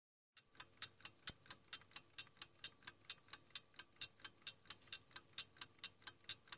Old Clockwork
This is the ticking of my old little alarm clock made in west germany.
Nostalgia, Ticking